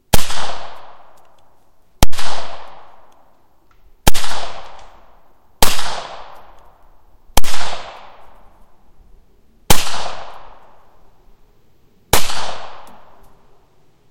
Bryco Arms Model 38 - 7 shots with slight shell noise
A TASCAM Dr-07 MkII stereo recording of the Bryco Arms Model 38, .380 ACP.
Recorded outside in a woodland environment. Here's a video if you like to see.